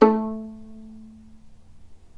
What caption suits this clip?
violin pizz non vib A2
violin pizzicato "non vibrato"
violin, non-vibrato, pizzicato